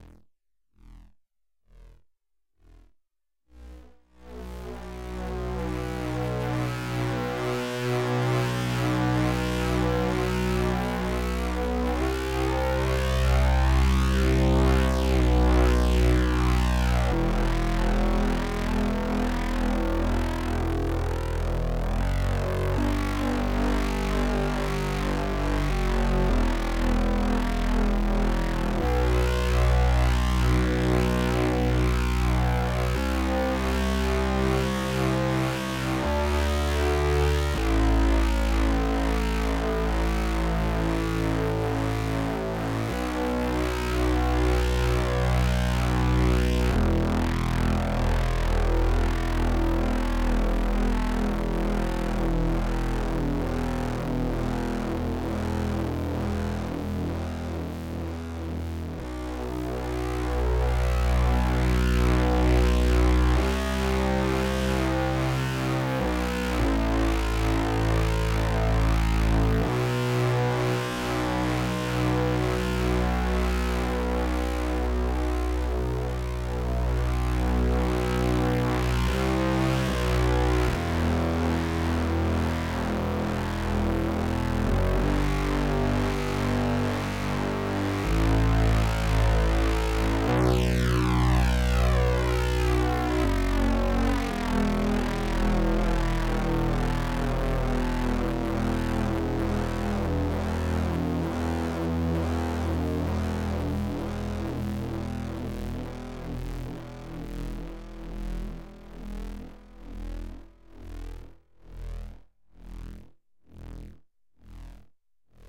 Lead MiniBrute
A Lead played by hand with the minibrute synthetizer